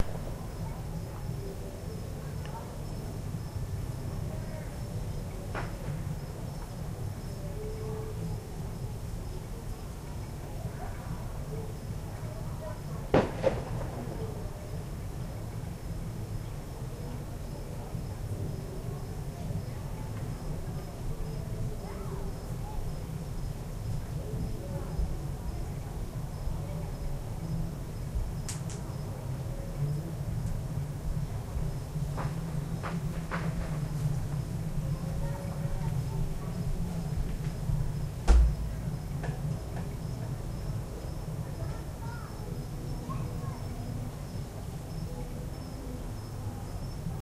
newyears fireworkscracklesome
A party in the distance and fireworks and firecrackers recorded with Olympus DS-40 and unedited except to convert them to uploadable format.
fireworks, new, years